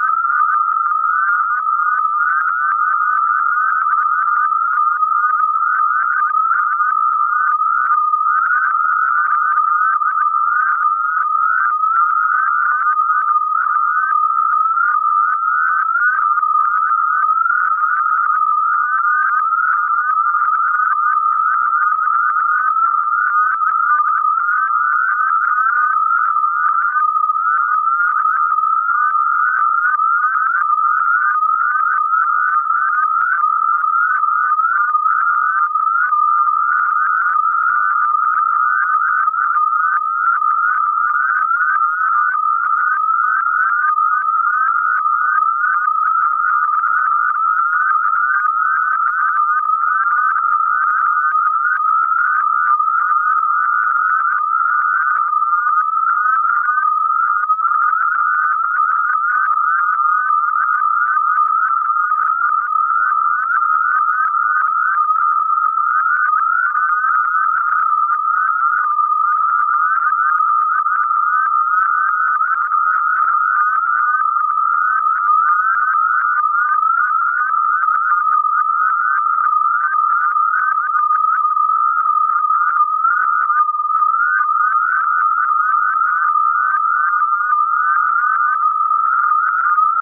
nature or whistlers
testing new generator. this one seems to imitate geomagmetic whistlers or some nature sounds.
drone, experimental, generator